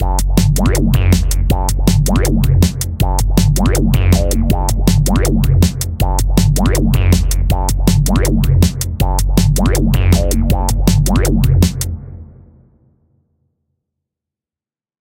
DnBbassline160bpm2+beat
Dark, acidic drum & bass bassline variations with beats at 160BPM
dnb, dark, lfo, beat, 160bpm, bassline, acid